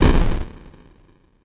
crushed sound
8-bit, bitcrushed, nes-style